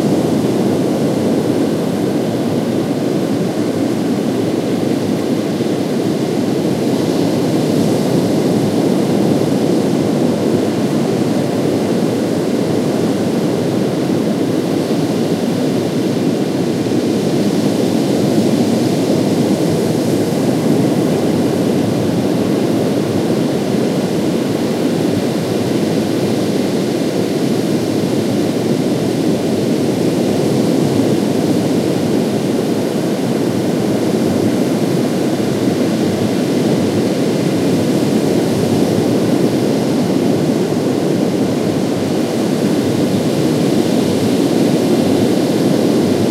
Crashing Waves
Strong waves crashing at the shore. Recorded in Aljezur, Portugal, 2017, using a Zoom H1. Minimal processing for reducing wind bass rumble and increasing gain.
sea, coast, water, lapping, shore, wave, wind, seaside, waves, beach